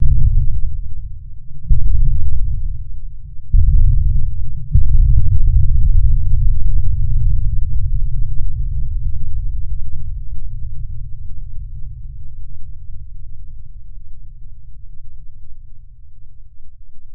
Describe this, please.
4 Fainted Explosions
I made this in FL stretching the 909 Clap. I stretched it very big and cutted of the silence.